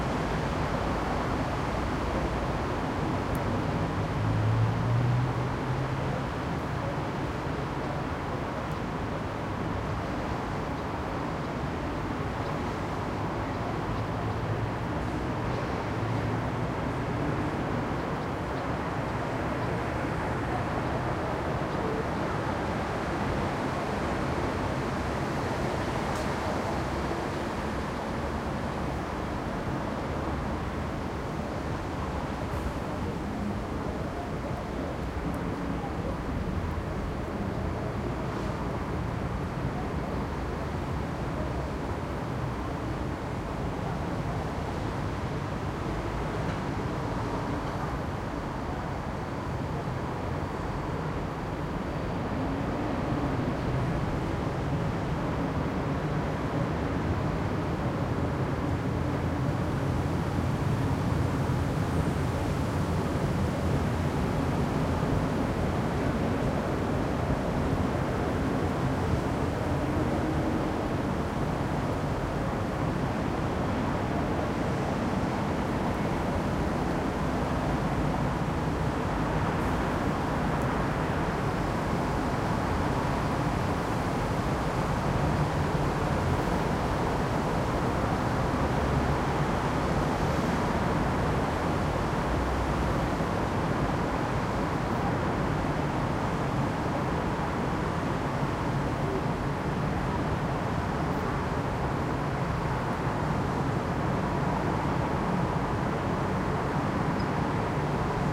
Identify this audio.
140812 Vienna SummerEveningWA F
Wide range 4ch surround recording of the summer evening cityscape in Vienna/Austria in the 13th district by Schönbrunn Castle. The recorder is positioned approx. 25m above street level, providing a richly textured european urban backdrop.
Recording conducted with a Zoom H2.
These are the FRONT channels, mics set to 90° dispersion.